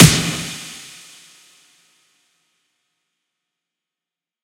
Punchy snareclap
A punchy, heavily processed snareclap with reverb.
snareclap, snare